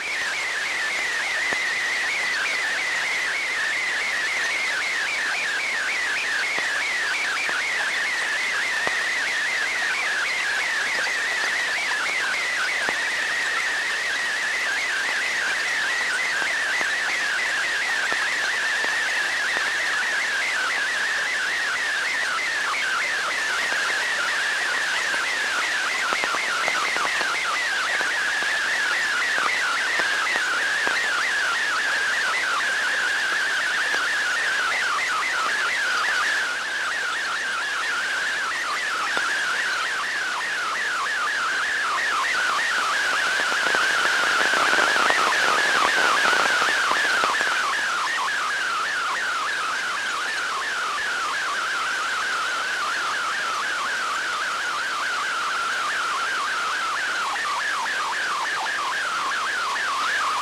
Various recordings of different data transmissions over shortwave or HF radio frequencies.